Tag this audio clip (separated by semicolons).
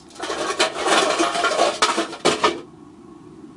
impact; aluminium; rattle; recycling; topple; kick; steel; can; clang; metal; tumble